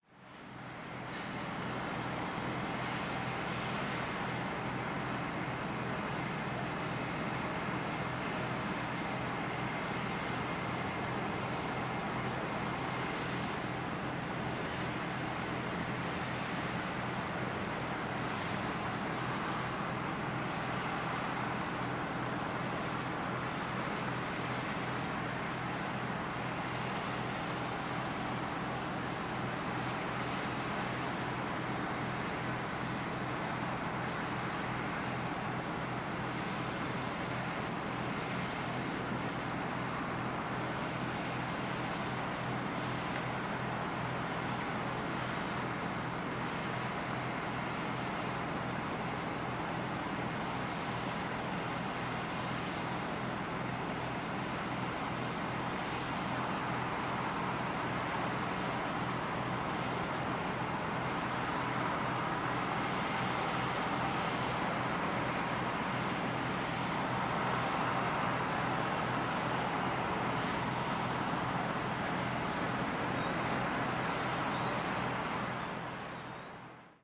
12092014 mostki parking las vegas
Fieldrecording made during field pilot reseach (Moving modernization
project conducted in the Department of Ethnology and Cultural
Anthropology at Adam Mickiewicz University in Poznan by Agata Stanisz and Waldemar Kuligowski). Soundscape of the Las Vegas parking site near of the national road no. 92. Mostki village. Recordist: Robert Rydzewski. Editor: Agata Stanisz